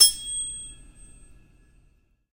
struck the back side of a metal teaspoon with another spoon, and let it ring.